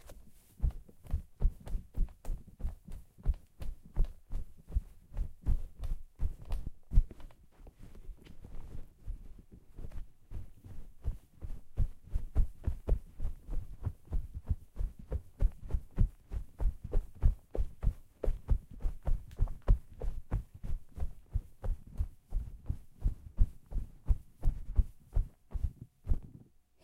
run carpet
Running on carpet in sneakers
carpet, feet, run, soft, footstep, footsteps, steps, foot, running, indoors